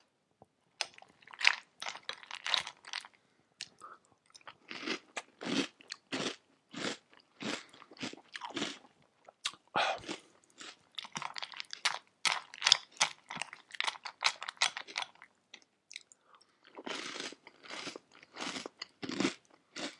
Corn Flakes and Milk Breakfast
breakfast, Corn, eat, Flaskes, Milk